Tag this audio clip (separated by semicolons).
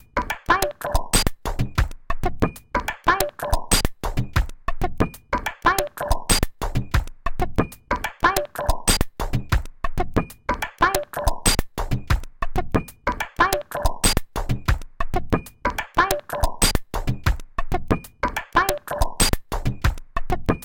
beat; industrial; harsh; techno; percussion; minimal; loop